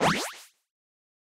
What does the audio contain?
Bouncing Power Up 1 5
In-game power up type sound made using a vintage Yamaha PSR-36 synthetizer.
Processed in DAW with various effects and sound design techniques.
Bouncing, Game, Happy, Keyboard, Power, PSR-36, Reward, Synth, Synthetizer, Up, Video, Vintage, Yamaha